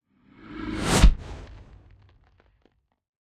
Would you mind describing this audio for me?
growling wooosh into hit and debris
Growling designed whoosh into impact with a debris tail
Sweep, transition, Whoosh